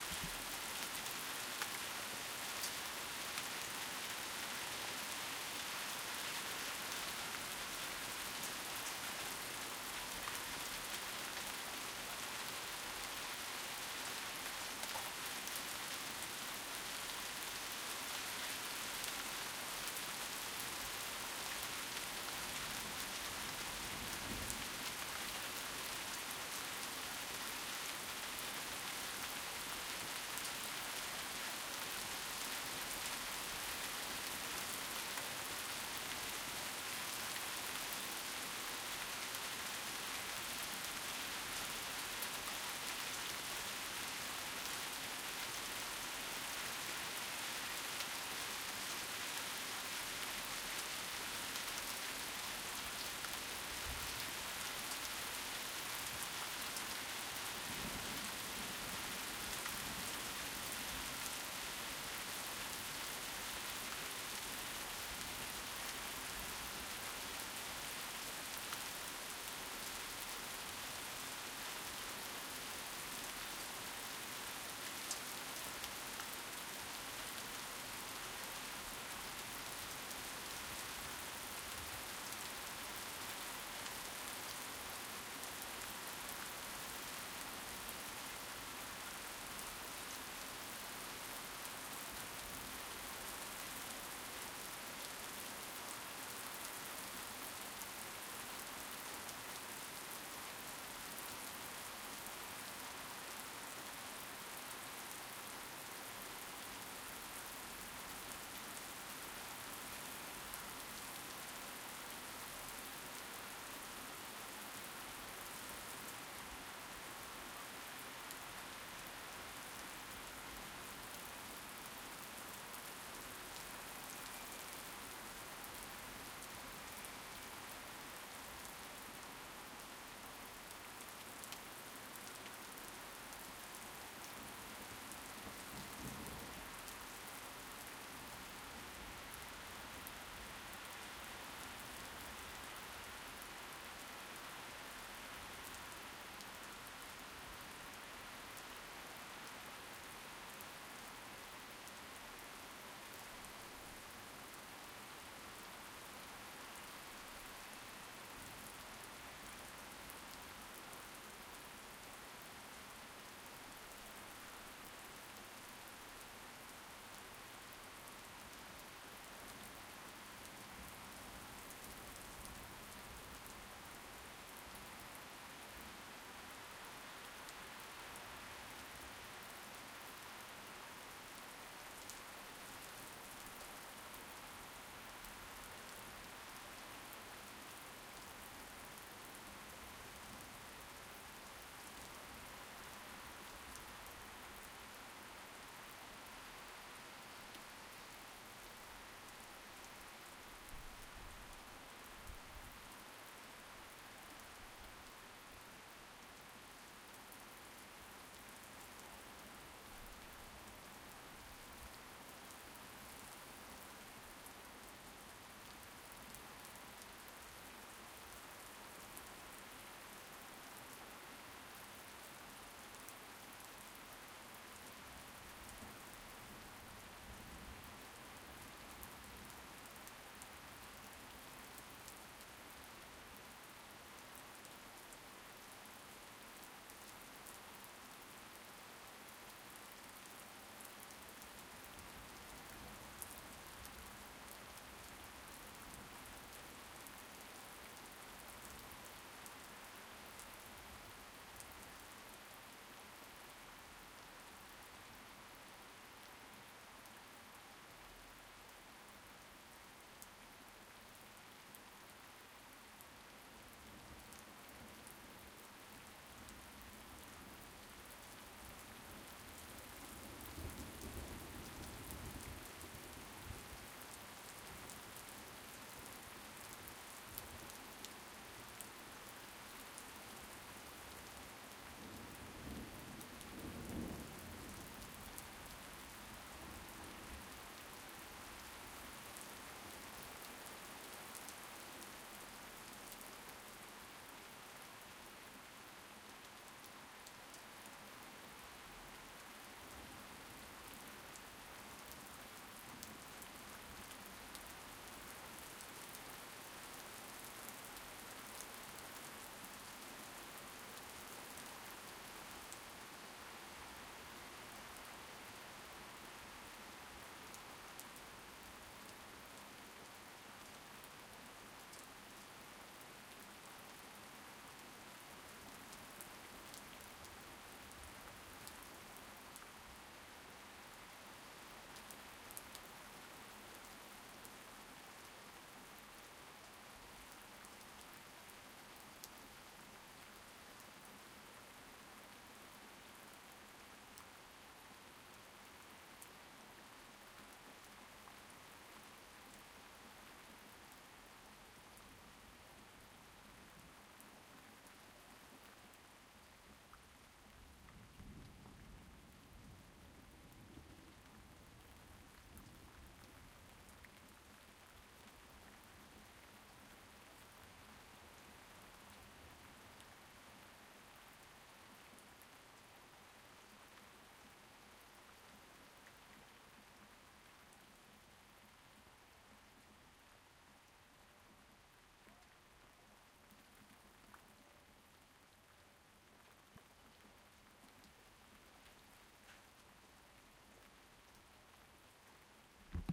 Heavy Rain
rain, nature, thunder